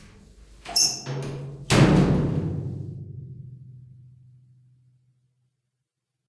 Closing metal door.
close-door, close-metal-door, closing-door, metal-door